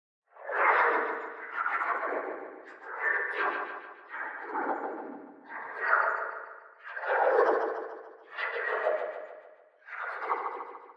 sci-fi transition
created by adding echo-reverb changing pitch and reversing guitar strums.